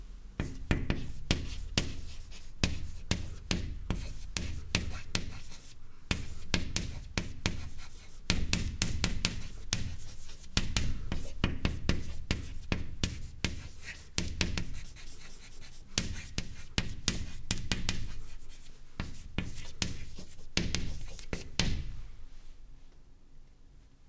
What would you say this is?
Chalk - Writing - 02
Someone writing on a blackboard with a chalk - interior recording - Mono.
Recorded in 2012